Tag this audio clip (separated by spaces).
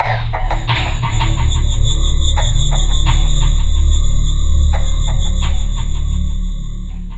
effect mixage